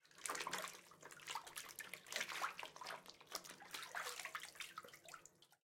Head struggling under water more water resistance
Used a basketball to mimic a head struggling under water. Recorded with an H4n recorder in my dorm room.
bathtub, water, splash, struggle, head